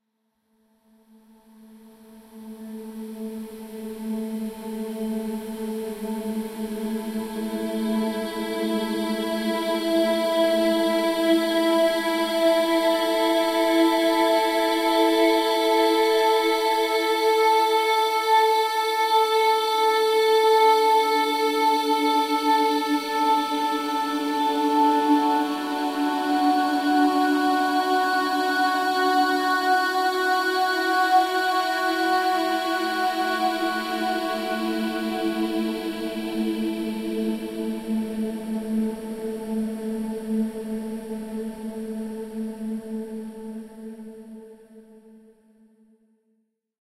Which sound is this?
An ethereal sound made by processing female singing. Recording chain - Rode NT1-A (mic) - Sound Devices MixPre (preamp)
atmospheric, blurred, choir, emotion, ethereal, experimental, female, floating, girl, synthetic-atmospheres, vocal, voice